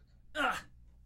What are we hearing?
35-voz dolor2
pain voice sound
sound, male, voice, pain